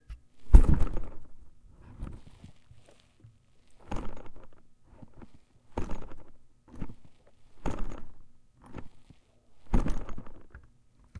thump dog body
body, dog, thump